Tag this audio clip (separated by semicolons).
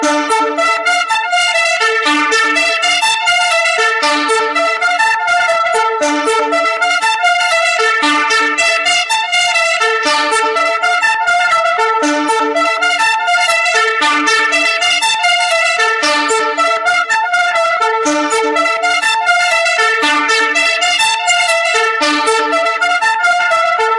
Dare-39; electronic; mysterious; mystery; Nitrous-PD; Novakill; synth; synth-loop; VST